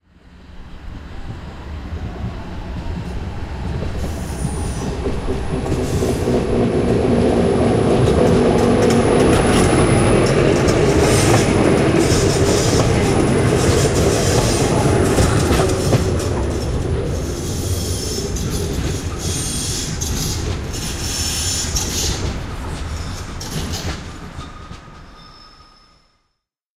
A passing tram